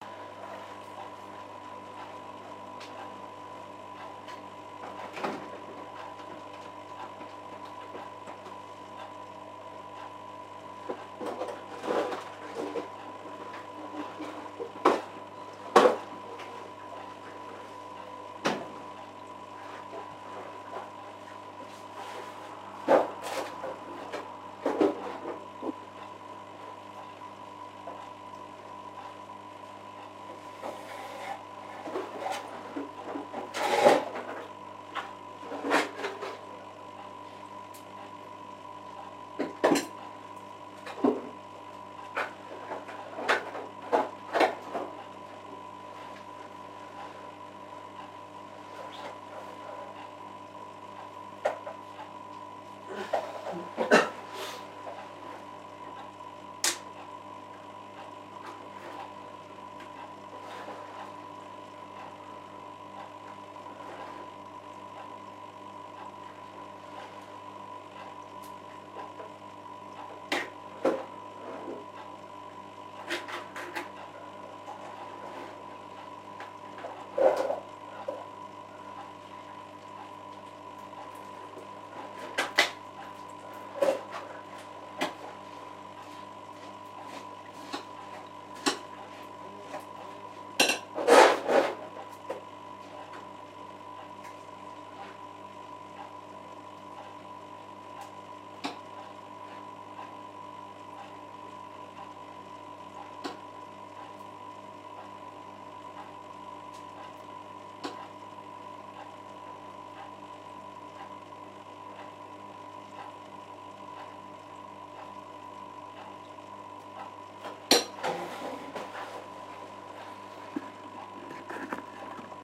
the sound of a fairly quiet kitchen with one person in it where the recorder was placed near a fishes water tank